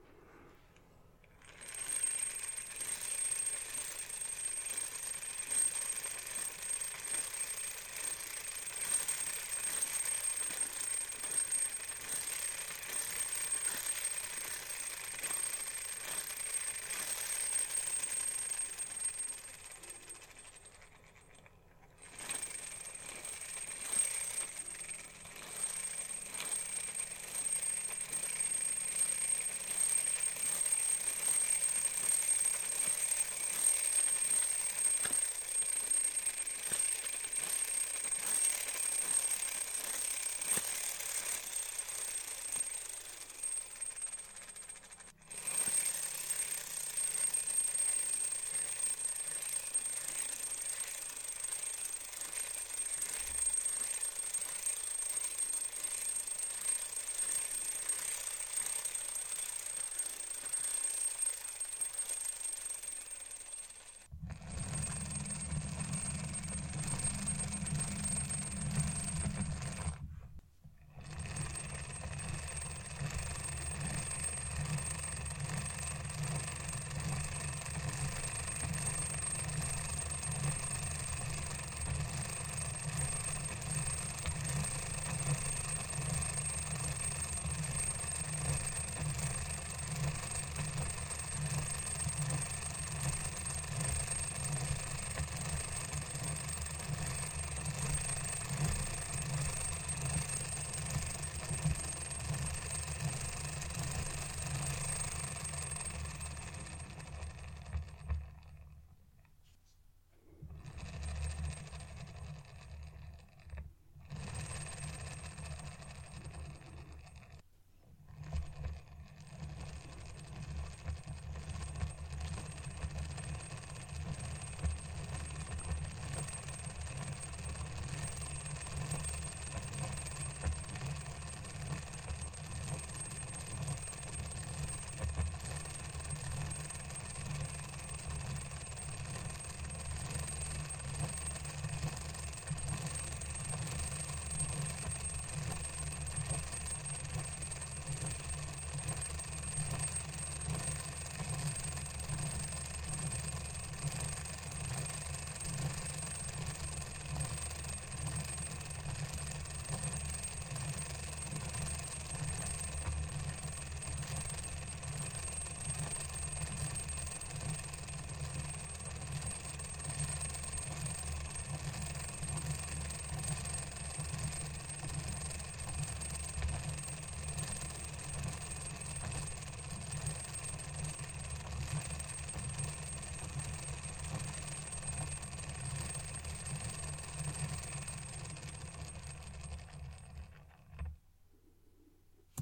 Some metal gears meshing with each other and turning.